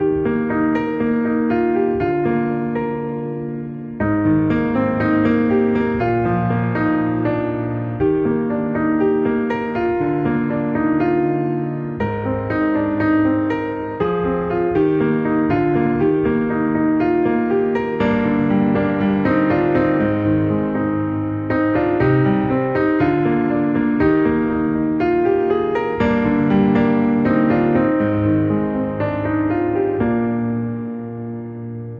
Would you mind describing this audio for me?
Emotional Piano 009 (D# - 120)
Emotional Piano 009 Key: D# - BPM: 120
Loop, Piano